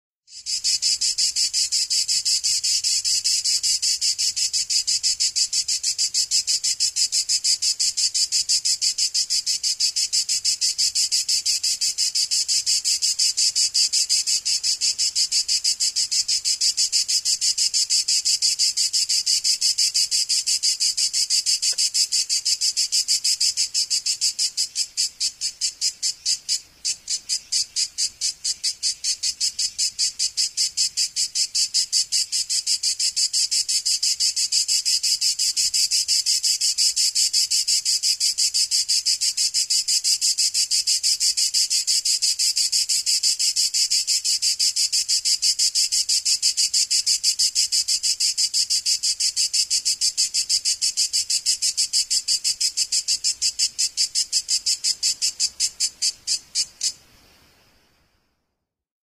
Cicada on tree, recorded in Corsica
insects, animals